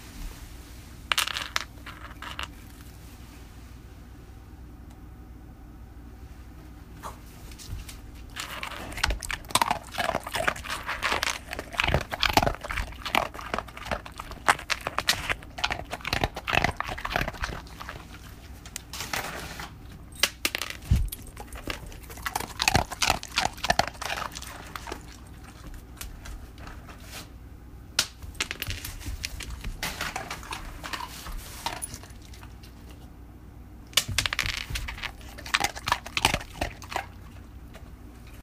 crunch - treat dropped and dog munches
The dog makes a distinct crunching, munching noise when eating his treats.
crunch, munch, eating, chewing, dog, chomp